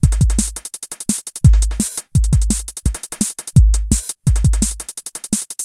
Some drum`n`bass beat i made.
808, audiotool, base, drum, tr